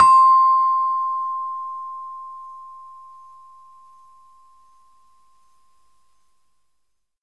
Sample of a saron key from an iron gamelan. Basic mic, some compression. The note is pelog 7, approximately a 'C'
pelog, saron, gamelan